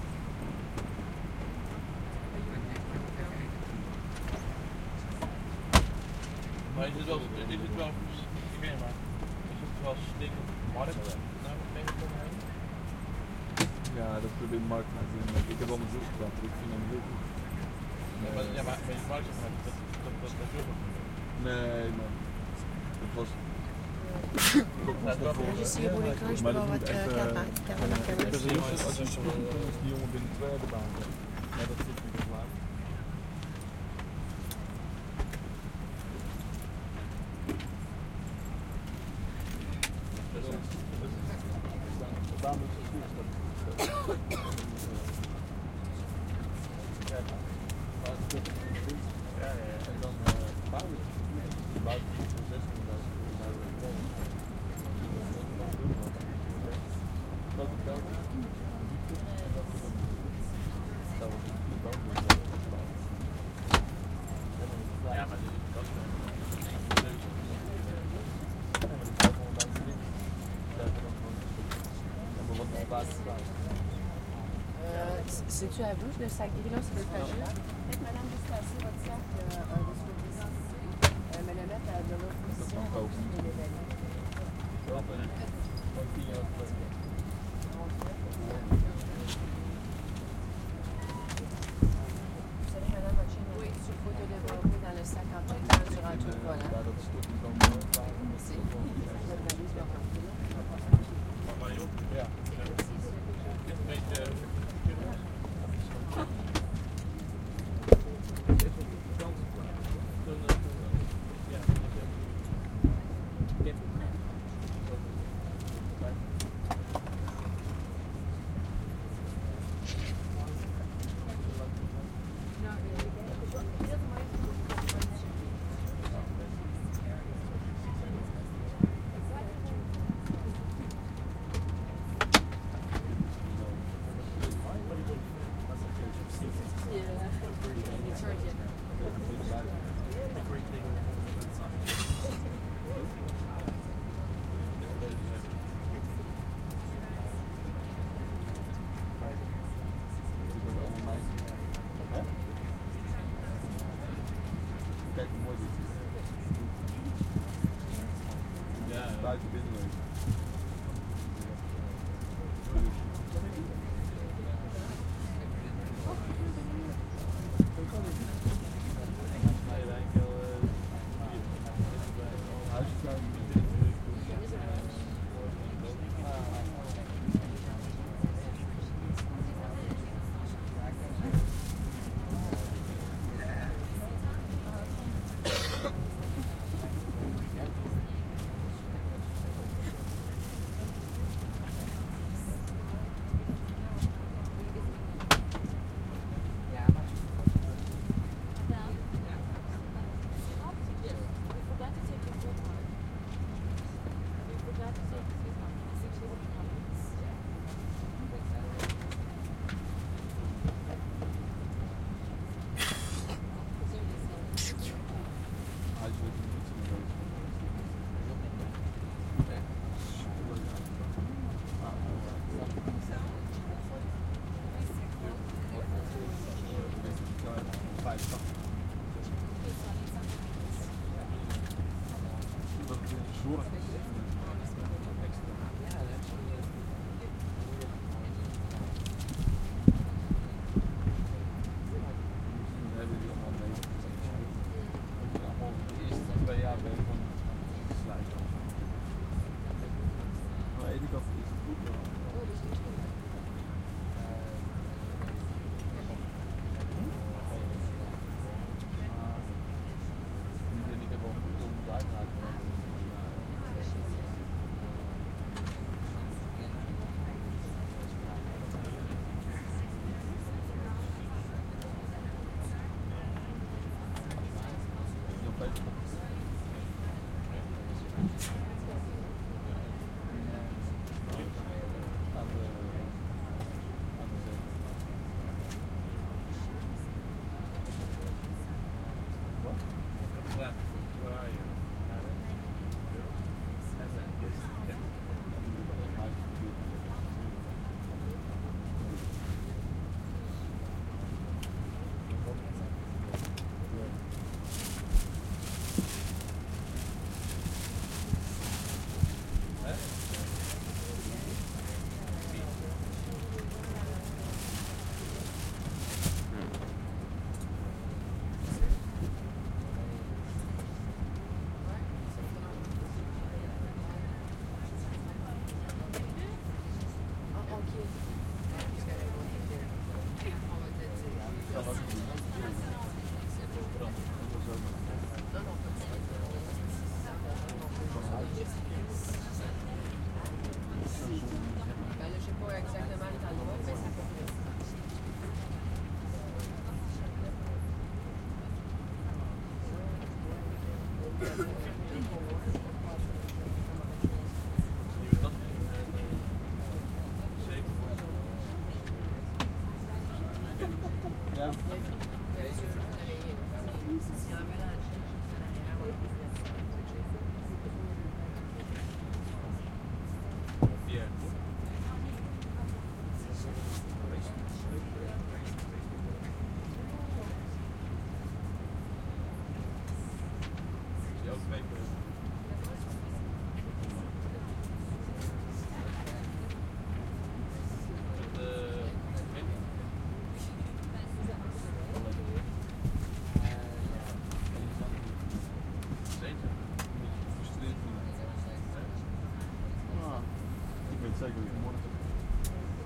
airplane int cabin light calm movement settling preparing for takeoff Montreal, Canada
airplane, cabin, calm, int, light, movement, preparing, settling, takeoff